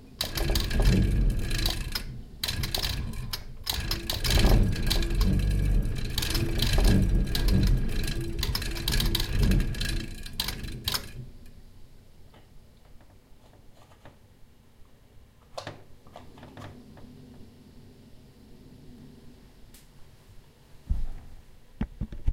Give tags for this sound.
electric
engine
old
refrigerator

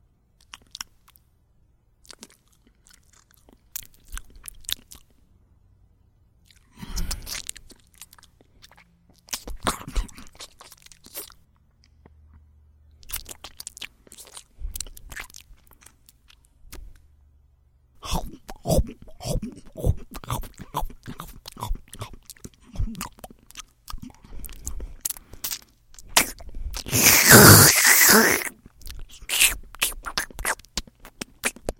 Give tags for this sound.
bite
biting
bug
chew
chewing
eat
eating
food
gross
insect
munch
munching
sloppy
slurp
slurping
wet

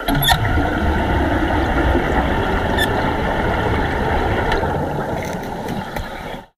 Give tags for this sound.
helmet
water
underwater
bubbles
breathing
diving

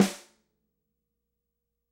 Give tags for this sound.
snare
velocity
stereo
drum
dry
real
multi
acoustic
instrument

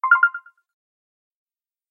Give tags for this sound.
levelup
pickup
take
mobile

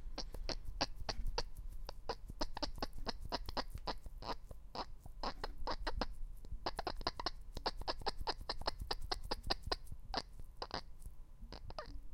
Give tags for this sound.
Eye
goo
gross
human
man
noise
slime

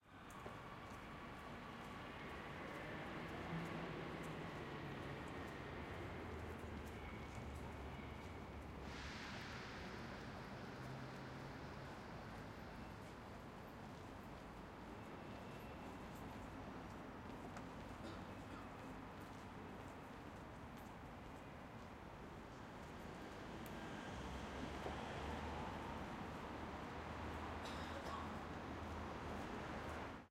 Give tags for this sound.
Glasgow
Walla
H6n
traffic
Street
crowd
Ambience
City
Zoom
people

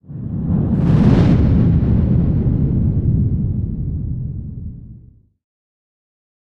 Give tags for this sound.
transition
rumble
space
effect
title
fly-by
fx
whoosh

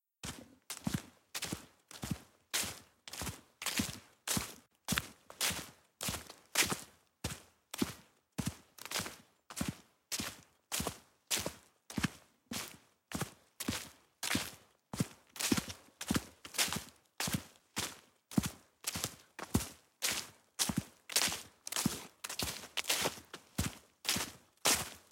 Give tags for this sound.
dirt
field-recording
footsteps
leaves

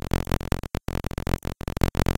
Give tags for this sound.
Audacity
glitch
processed

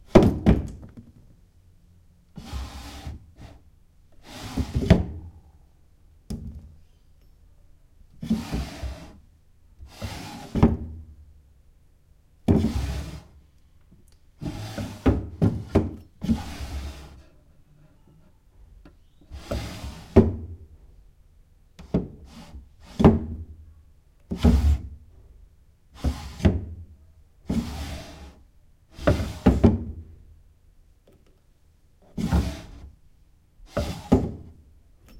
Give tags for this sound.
drawer
open
slide
pull
push
wood
close

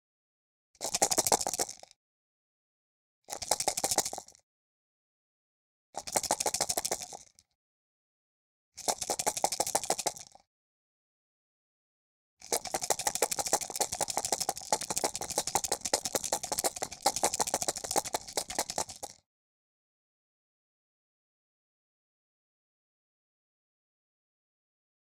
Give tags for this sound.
dice
die
foley
game
yatzy

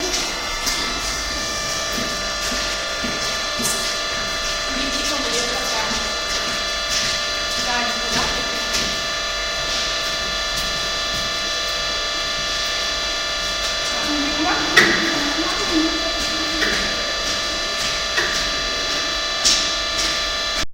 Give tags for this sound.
error
machine

